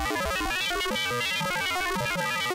SCIAlrm 8 bit robot
8-bit similar sounds generated on Pro Tools from a sawtooth wave signal modulated with some plug-ins
8bit, beep, alarm, scifi, computer, alert, synth, robot, spaceship